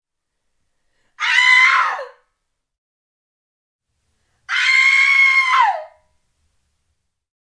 Scream, female x2
Recorded on Zoom H4n.
A piercing, female alto scream recorded indoors (some room reverb).
scream, voice, fear, human, terror